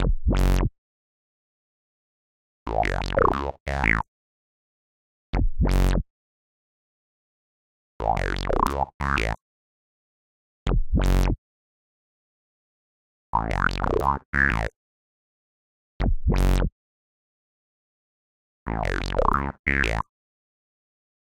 jelly bass i made for jelly makes me happy